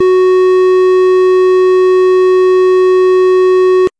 LR35902 Square Fs5
A sound which reminded me a lot of the GameBoy. I've named it after the GB's CPU - the Sharp LR35902 - which also handled the GB's audio. This is the note F sharp of octave 5. (Created with AudioSauna.)
chiptune, fuzzy, square, synth